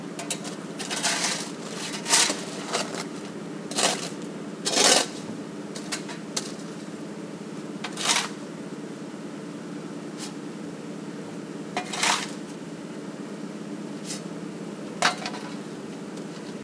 A shovel scraping up the pavement. Recorded on a mini-DV camcorder with an external Sennheiser MKE 300 directional electret condenser mic.